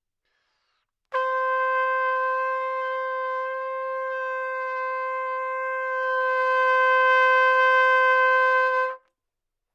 Trumpet - C5 - bad-timbre-errors
Part of the Good-sounds dataset of monophonic instrumental sounds.
instrument::trumpet
note::C
octave::5
midi note::60
good-sounds-id::2984
Intentionally played as an example of bad-timbre-errors
multisample, good-sounds, single-note, trumpet, neumann-U87, C5